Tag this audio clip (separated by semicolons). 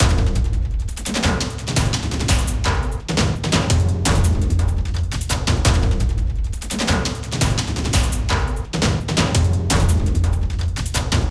170bpm; Hollywood; Cinematic; Drum; Percussion; Action; Movie; Film; Loop; Drums